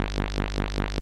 sherman cable86
I did some jamming with my Sherman Filterbank 2 an a loose cable, witch i touched. It gave a very special bass sound, sometimes sweeps, percussive and very strange plops an plucks...
fat, cable, current, phat, ac, analouge, electro, filter, analog, touch, filterbank, sherman, noise, dc